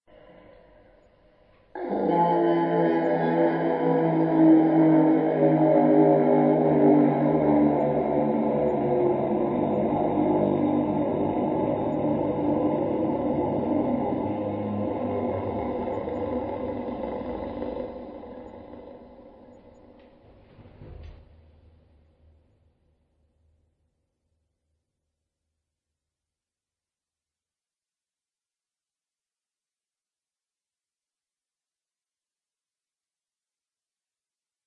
Zombie Graboid Death Gasp
death, howl, echo, grasp